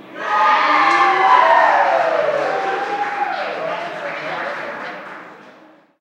a group of people cheering during a soccer match on tv
bravo, cheer, clapping, applause, encouragement